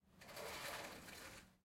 sliding door open, quad
Quad recording of sliding glass door opening. Left, right, Left surround, right surround channels. Recorded with Zoom H2n.
door, l, ls, opening, quad, r, rs, sliding